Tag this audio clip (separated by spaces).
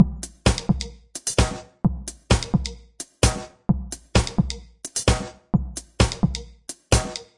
bateria de loop